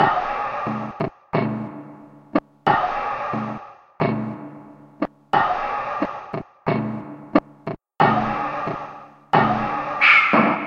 emptyheartdrumloop90BPM
Atmospheric moody drum loop 90BPM
90, atmospheric, BPM, Drum, loop